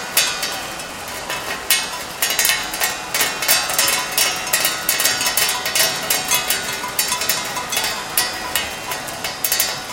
metal-drops
Rain dripping on a flat metal.
End of summer 2017. Vivers Park, Valencia, Spain.
Sony IC Recorder and Audacity by Cristina Dols Colomer.